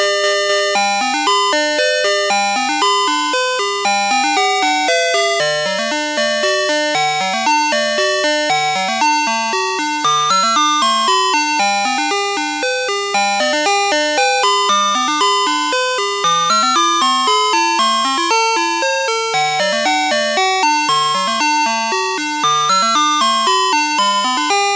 La Cucaracha Digital II Chime song 2.
cream, chime, Ice